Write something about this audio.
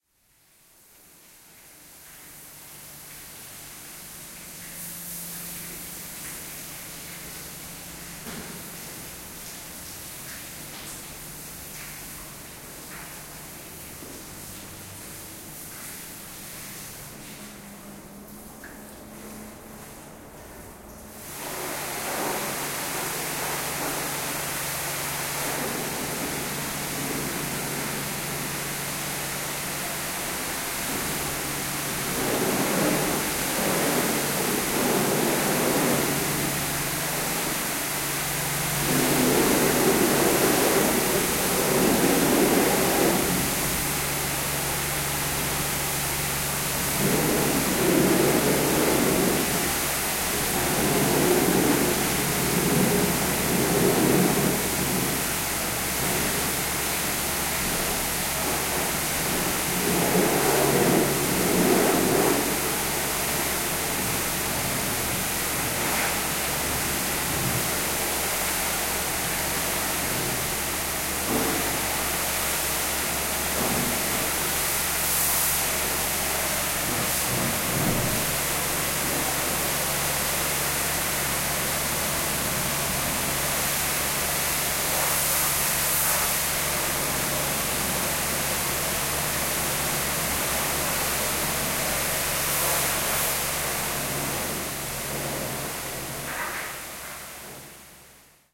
Clara Hose cleaning floor
Cleaning of floor with a hose.